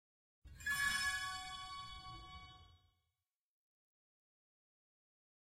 Laptop start
strumming over headplate of a mandoline with reverb fx chain
mandoline, computer, melodie, sound, laptop, start, pc